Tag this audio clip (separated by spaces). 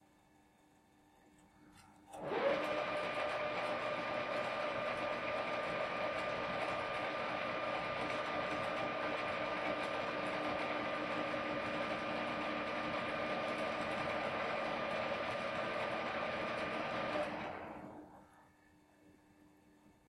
worktools tools